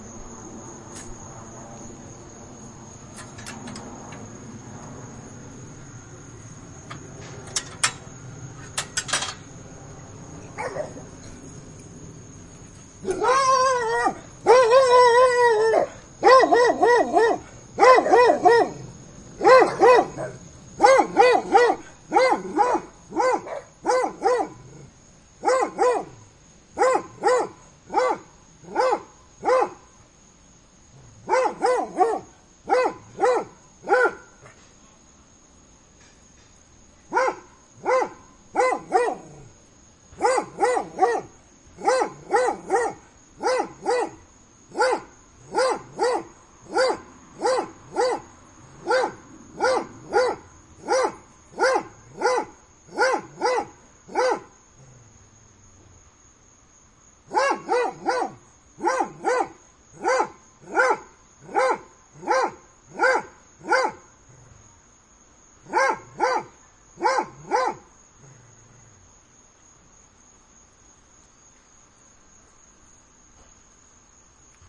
Dogs Barking through Fence on Summer Day (binaural)
My neighbor's dogs bark at anything outside the fence.
Recorded with a Zoom H1 with two Sony lavaliere microphones attached, tucked into home-made felt pouches as windscreens, which I suspended from my glasses frames.
binaural, Zoom, dogs, dog, barking